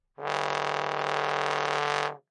One-shot from Versilian Studios Chamber Orchestra 2: Community Edition sampling project.
Instrument family: Brass
Instrument: OldTrombone
Articulation: buzz
Note: D2
Midi note: 38
Room type: Band Rehearsal Space
Microphone: 2x SM-57 spaced pair